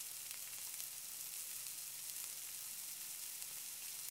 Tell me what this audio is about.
Sizzle(loop)
Sizzling meat patties. LOOPABLE
cook, food, fry, oil, sizzling